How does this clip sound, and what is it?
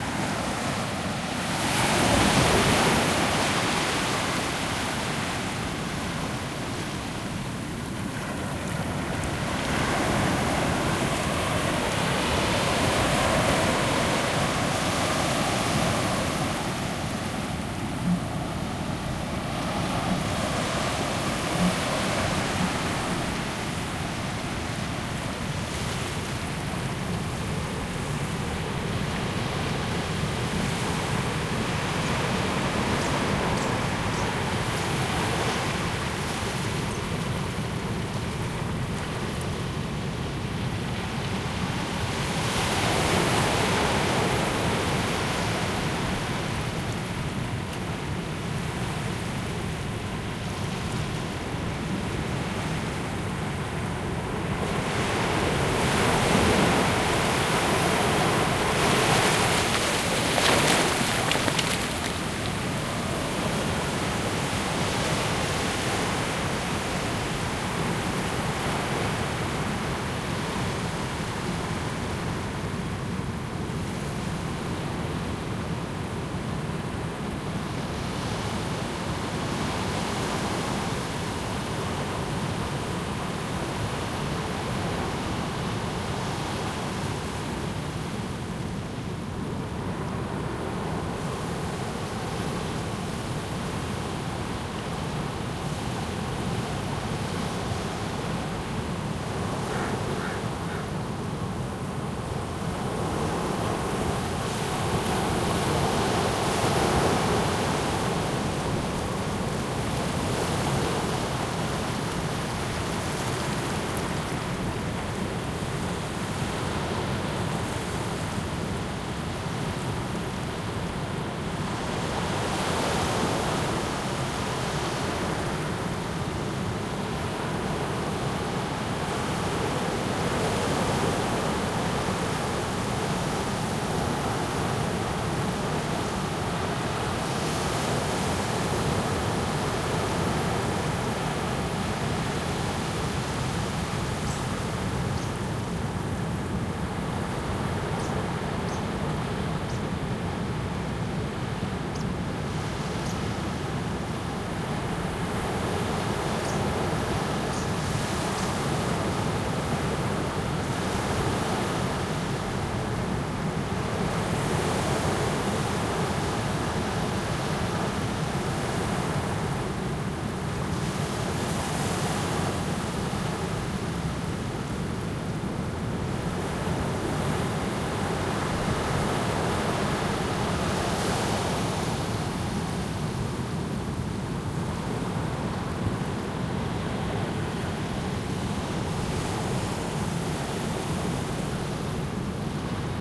Indian Ocean - Waves
Recorded at Kenya, Mombasa, Shanzu Beach on a windy day in July 2012. Sound of waves in a medium strength breeze and occasional bird voices. XY stereo recording with mics placed 10 meters from the water.
seaside, wind, beach, rumble, wave, breeze, field-recording, waves, water, coast, ocean, shore, sea